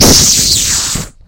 robotic parts electrical
the sound that plays when a robot an electrical noise
machine, mechanical, robot